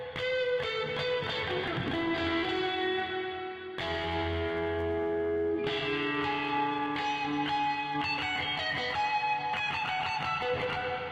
guitar mega samples pack five (23)
This is one is blues beat from my Hyper Mega Blues Pack .
This one is free .
You can check the rest of the bues samples here :
distorted, lead, lead-guitar